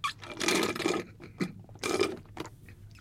Microfone Superlux 360 modelo CMH8D
Fonte: Canudo de plástico e água
Gravado para a disciplina de Captação e Edição de Áudio do curso Rádio, TV e Internet, Universidade Anhembi Morumbi. São Paulo-SP. Brasil.